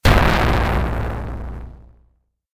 Sci-Fi Distortion9
Explosion, Bomb, War, Dynamite